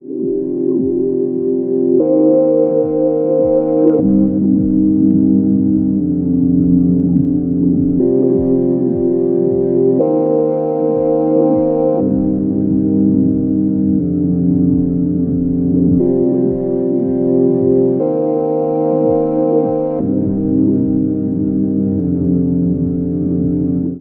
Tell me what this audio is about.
Synthloop in 120bpm with some easy filteringwarp.
loop, pad